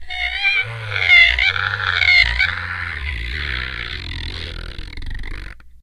scree.flop.10
daxophone, friction, idiophone, instrument, wood